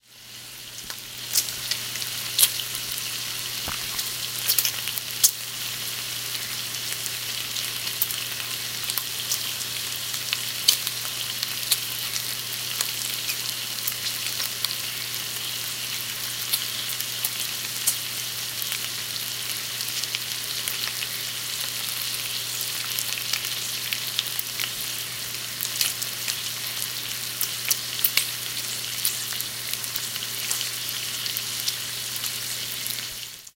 Frying bacon in a pan.
sizzling, sizzle, food, cholesterol, griddle, oil, grease, sausage, kitchen, cooking, stove, bacon, pan, frying